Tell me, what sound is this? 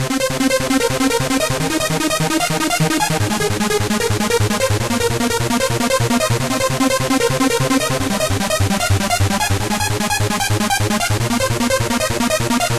well... i have done some trance stuff to use in songs... if there's any need for them... i hope you people like them, I'm making more all the time. i saw there's no-one that good as Flick3r on trance/techno loops here, so i decided to make my place here as a nice electronic sampler/looper. cheers.